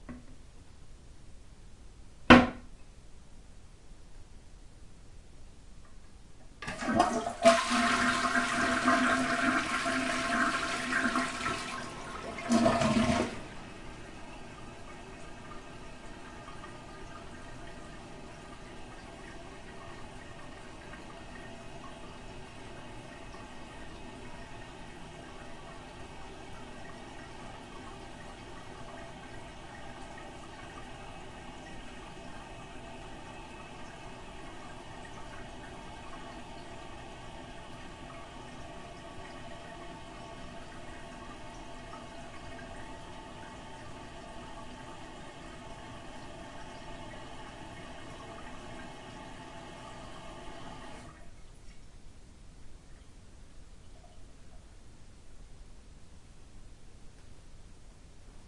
Toilet Flush 05 08 12
My bathroom. settled the lid onto the seat (a bamboo seat) and flushed the toilet. takes a while for the tank to fill. Recorded with a Tascam DR-08.
close, water